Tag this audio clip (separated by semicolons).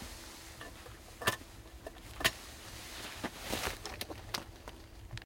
cartridge; cartridges; clothing; gun; gun-sleeve; loading; over-and-under; pheasants; rustling; season; shells; shooting; shot; shotgun; side-by-side; sleeve